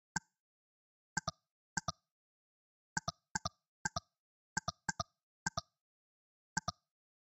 Interface Click SoundFX
Sound Effect for Clicking on an Interface, hope you like it.
sound-fx, click, mouse-click, interface